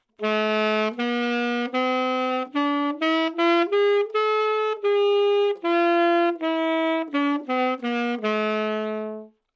Sax Tenor - A minor - scale-bad-rithm-staccato-minor-harmonic
Part of the Good-sounds dataset of monophonic instrumental sounds.
instrument::sax_tenor
note::A
good-sounds-id::6227
mode::harmonic minor
Intentionally played as an example of scale-bad-rithm-staccato-minor-harmonic